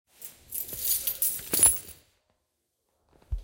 sound effect of falling keys
effect, sound, keys, falling